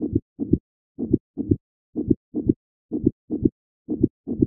Beating human heart
beating, heart, Human